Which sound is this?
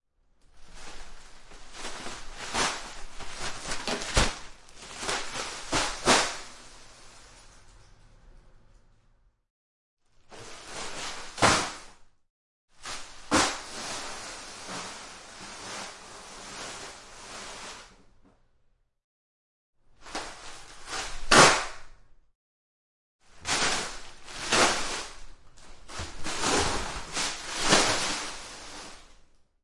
garbage bag plastic throw down apartment staircase and handling
down, apartment, bag, plastic, garbage, throw, staircase